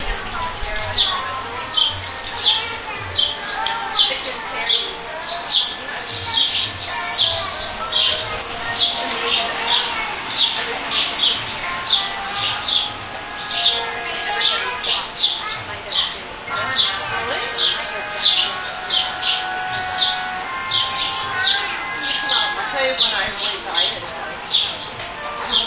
Field recording of waiting in line at a bus station outside. Birds chirping and tinny music.